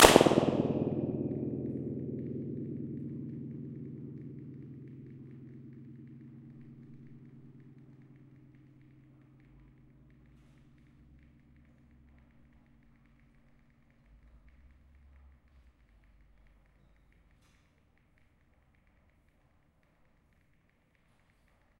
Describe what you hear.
Balloon burst 2 at NationalTheatret Stasjon, Oslo
More on the location can be found at my Acoustic Engineering Blog where I also do an acoustic analysis of the balloon burst. The first major reflection in this recording was very slightly clipped.
balloon, burst, flutter-echo, norway, oslo, sound-sculpture, train-station